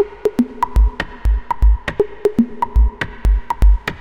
BS Special Perc 1
Hypnotic percussion loop (120 BPM)
groovy, percussion-loop, 120-bpm